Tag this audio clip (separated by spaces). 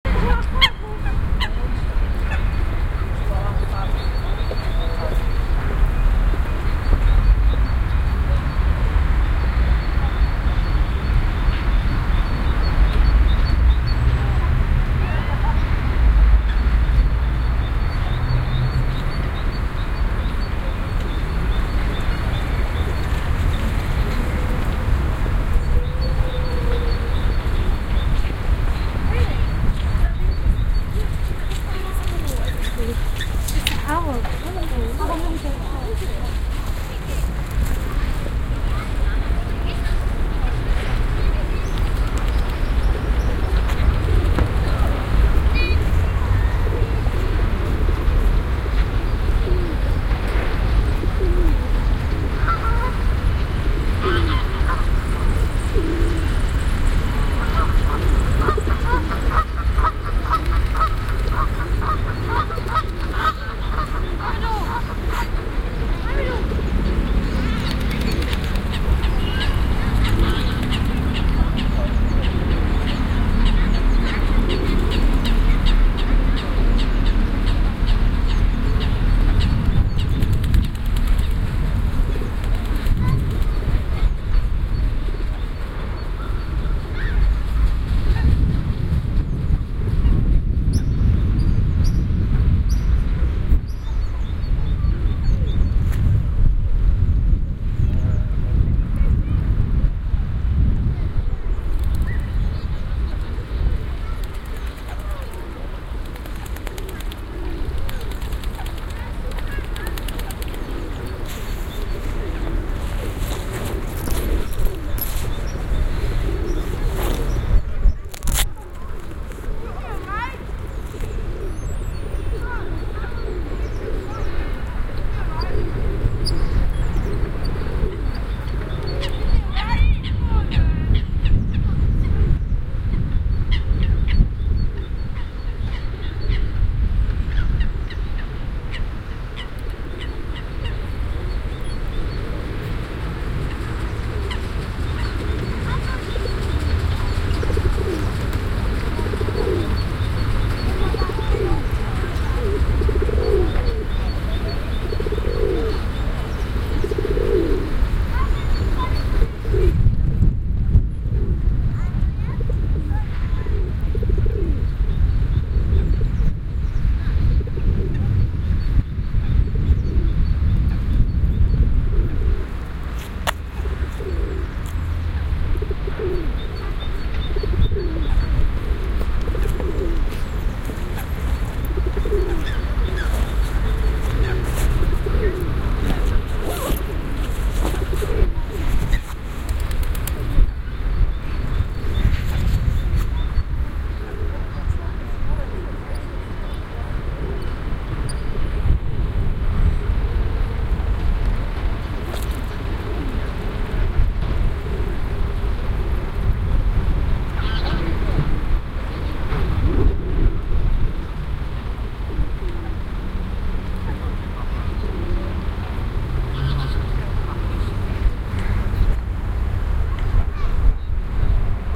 atmosphere ambient soundscape general-noise ambience field-recording background-sound london ambiance city